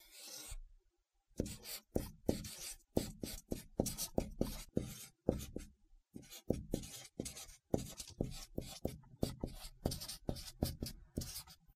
Blue (!) marker pen writing on a paper flip pad.